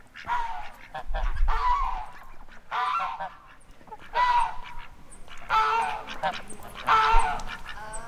Geese honking at Cibolo Creek Ranch in west Texas.